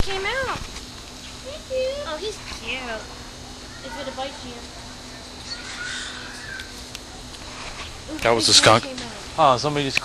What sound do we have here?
Snippet of sound from the Busch Wildlife Sanctuary recorded with Olympus DS-40.
field-recording, nature